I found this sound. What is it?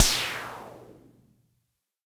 electro harmonix crash drum